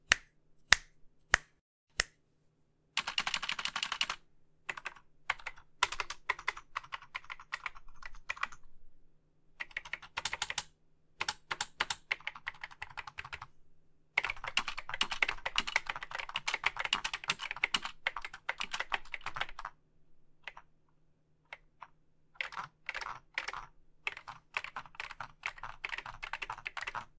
Keyboard Typing Sounds
Typing sounds from the keyboard module of a Xerox Memeorywriter 6016 typewriter. It uses brother dome and foil switches that have an oddly frog-like sound. The first 4 sounds are me snapping my fingers.
keystroke, mechanical, typewriter, typing, keyboard, vintage